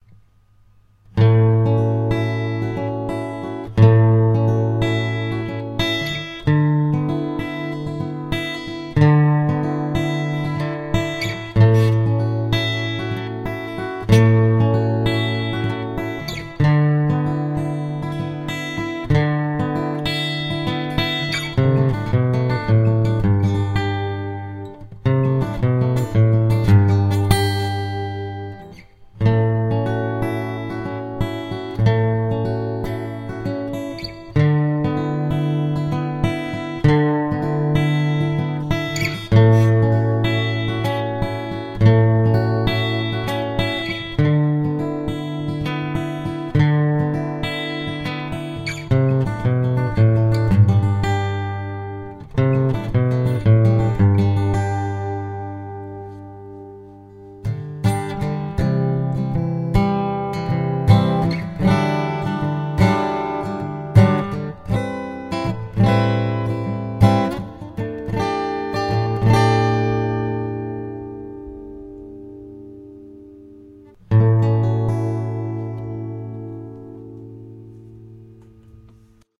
This is easey acoustic composition, plaed by arpeggio